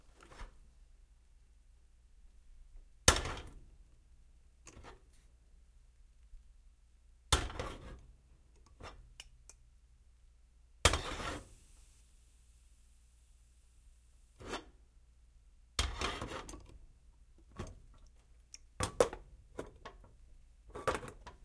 kettle on stove and pickup remove
pickup, kettle, remove, stove